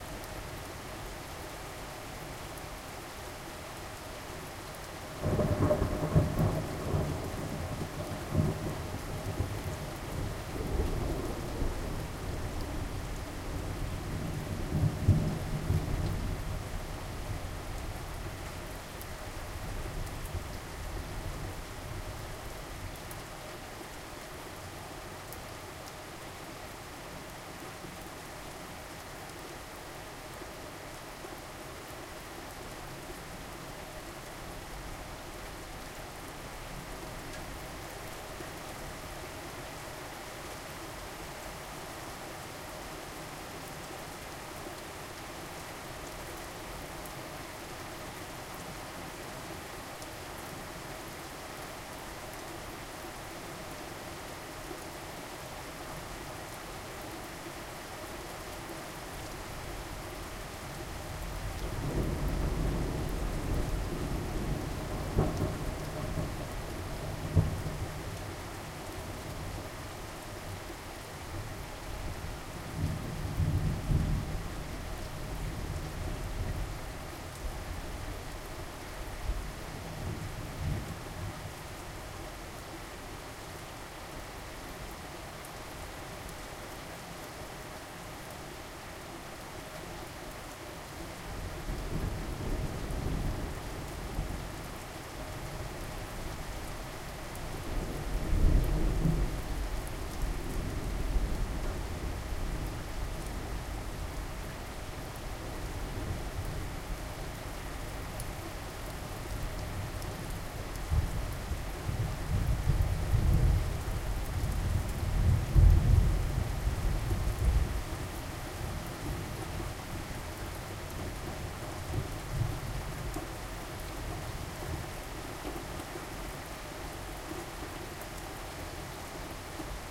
Thunderstorm in the night. A lots of rain and thunders.
field-recording, lightning, nature, rain, rainstorm, storm, thunder, thunder-storm, thunderstorm, weather